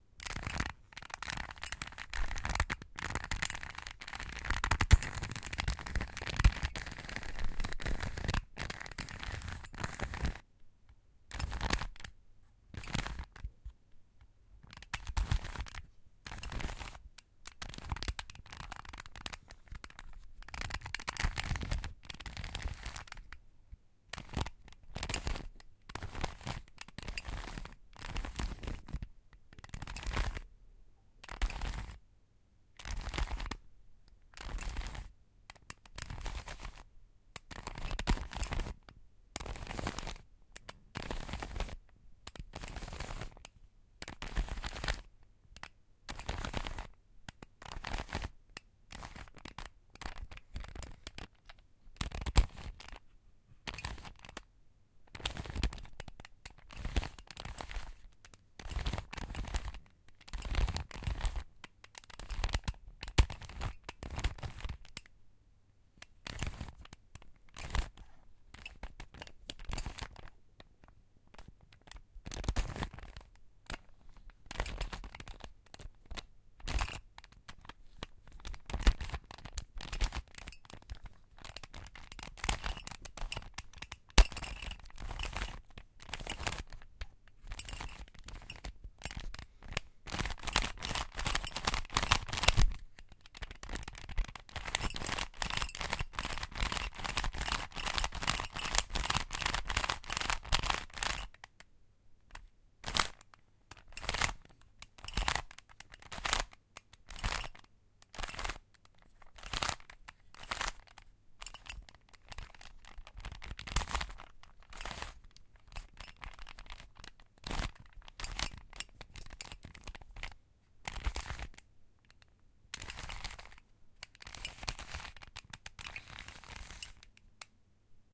Rubik's Cube: section rotations pt.2
This is the recording of a Rubik's cube manipulation.
I recorded myself rotating the Rubik's cube sections in variuos directions and at variuos speed.
Typical plastic and metallic sounds.
Cube, manipulation, rotation, Rubik